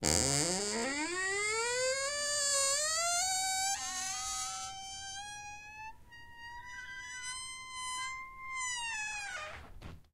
A door with creaky hinges being opened slowly.